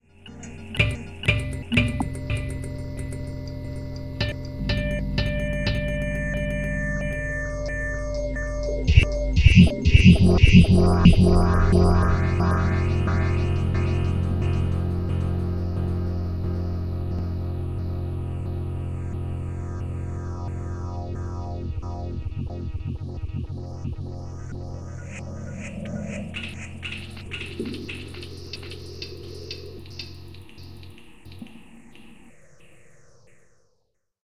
remix of "Tuning a Roland Oetter acoustic guitar" added by juskiddink (see remix link above)
slow down, filter, glitch